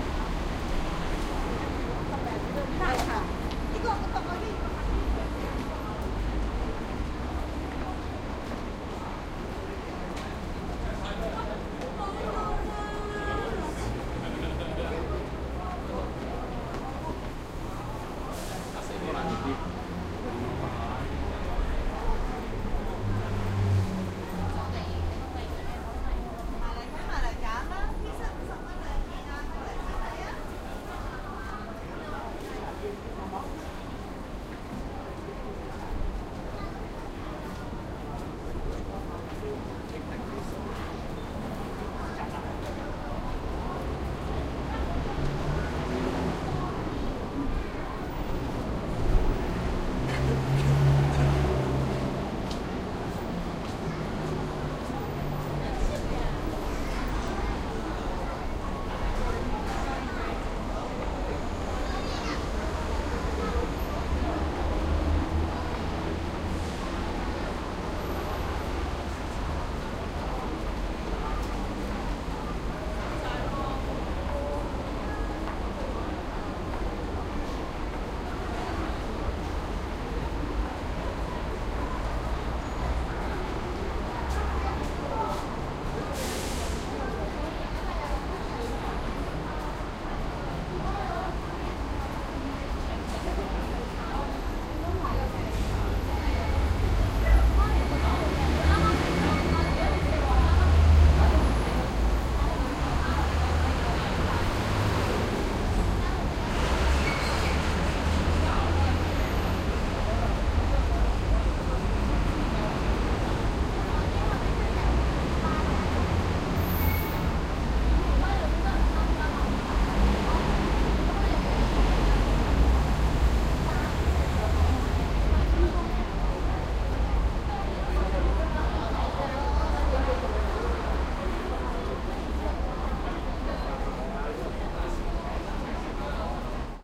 City walk in Hong-Kong.
I recorded this audio file while I was walking through Hong-Kong city. You can hear some typical sounds and noise from this town, like traffic, people talking, walking, etc…
Recorded in March 2015, with an Olympus LS-100 (internal microphones).